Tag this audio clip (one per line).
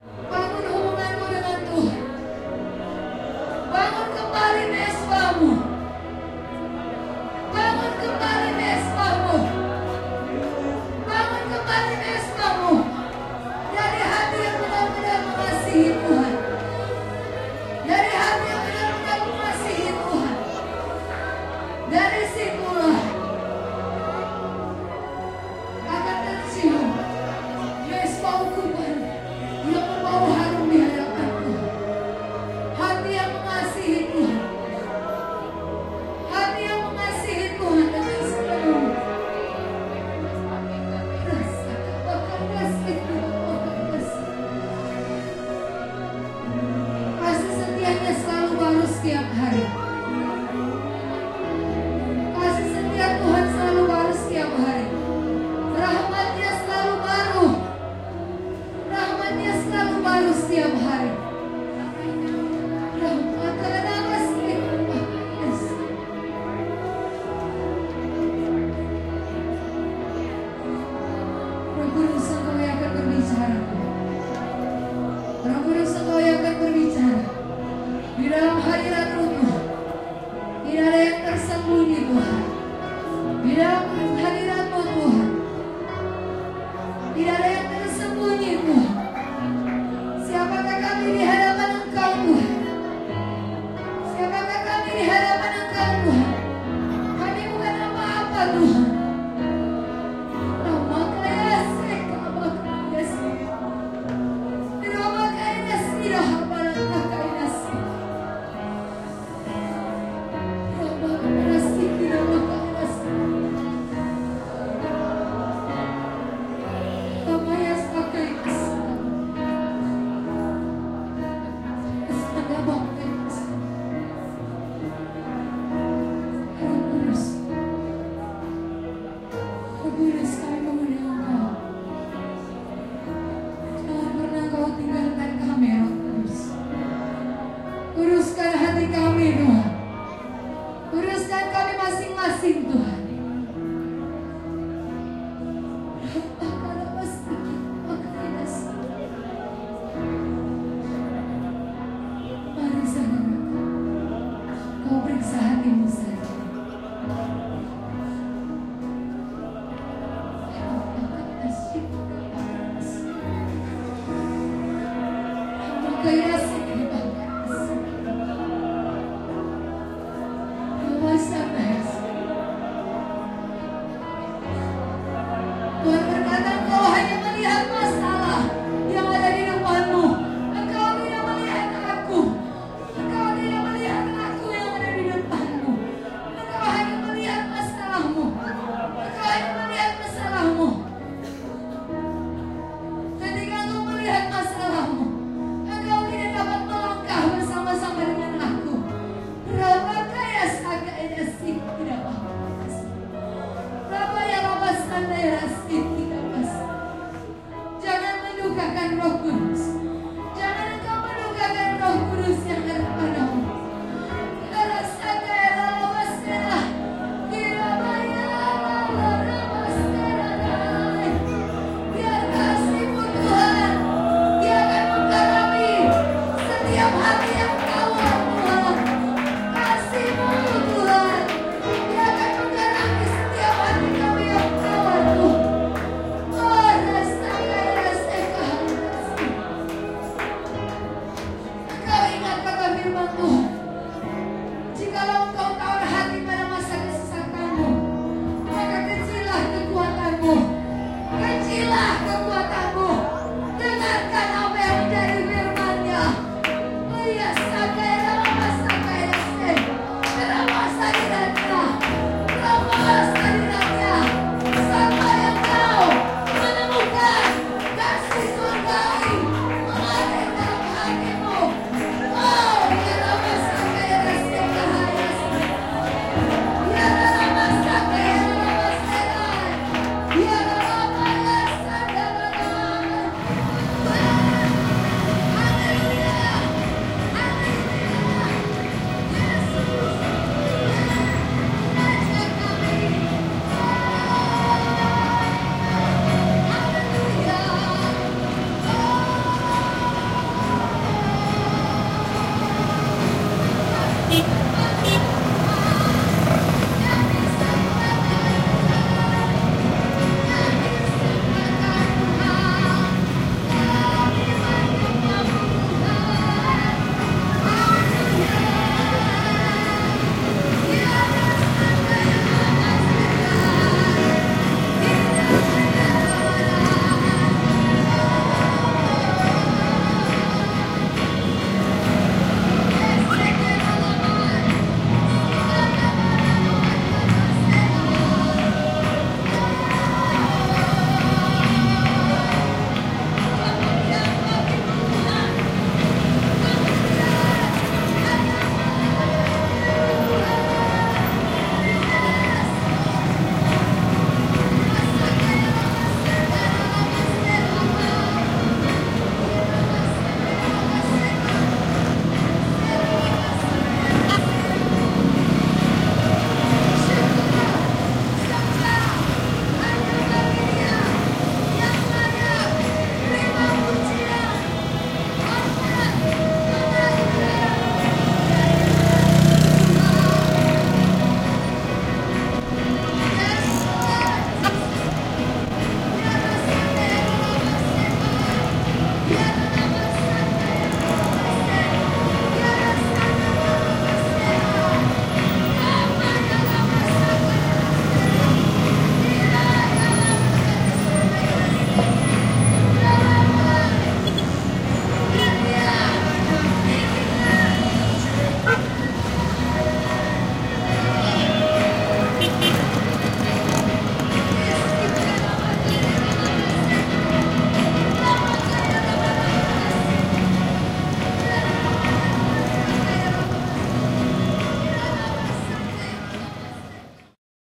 Baptist
catholic
Celebes
Christian
church
congregation
field-recording
holy
Minahasan
Minister
prayer
priest
raspy
religion
religious
singing
Sulawesi
voice
worship